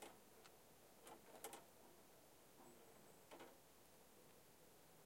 I recorded sound of a vane on the pond.
forest, vane